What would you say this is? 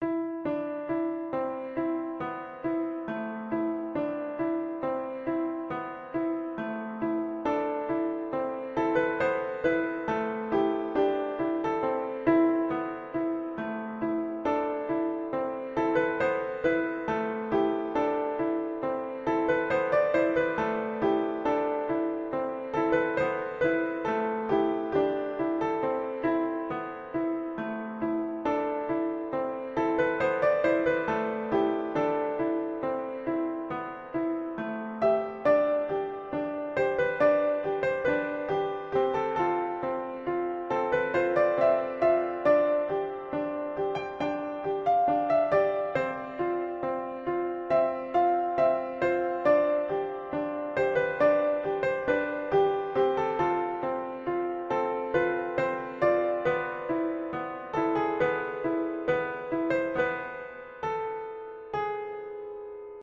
This is just a short loop you can use of me playing a song I wrote called "October Rose." It's kind of a sad feeling song. Eversole.
Horror, Game, October, Music, Haunting, loop, Piano, Video